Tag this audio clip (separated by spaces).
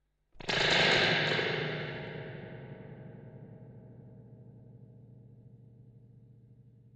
Reverb Spring Amp